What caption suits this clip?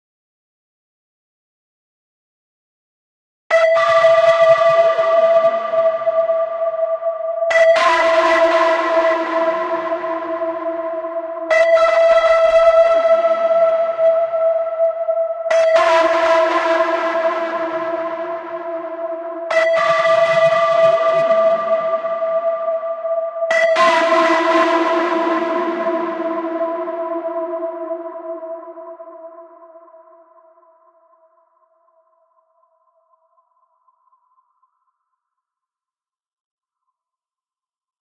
Interstate Synth Stabs
Eerie synth stabs distorted with ohmicide.
[BPM: 120]
[Key: G minor]
Reverb, synth, BPM, FX, G, Highway, 120-BPM, SFX, Minor, Techno, distorted, Ohmicide, Dark, 120, Ohm-Force, Rvb, Horror, Eerie, Loop, G-minor